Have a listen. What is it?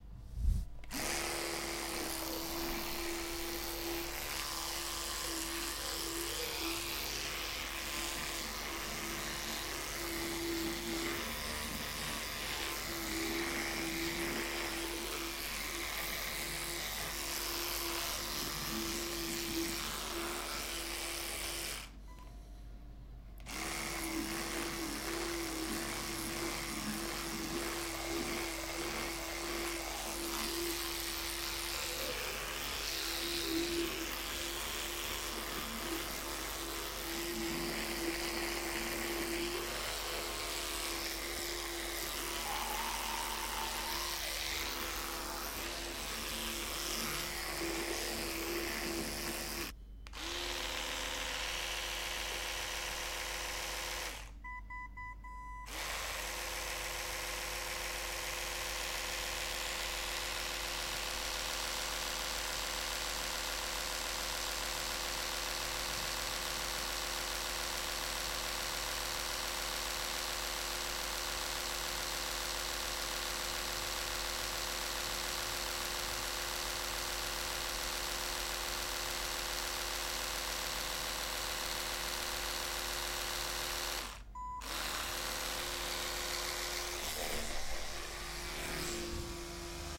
electric toothbrush
electric, toothbrush, motor